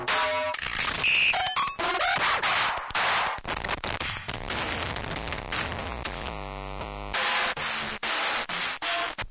DR550 Loop 3
I BREAK IT YOU BUY IT !!! It's a new motto.....
Hehehehe This is a Bent DR 550 MK II Yep it is....